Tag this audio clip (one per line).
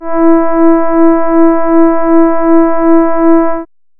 brass
warm
synth
horn